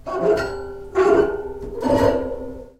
Metal Rub 6
Rubbing a wet nickel grate in my shower, recorded with a Zoom H2 using the internal mics.
metal, nickel, rub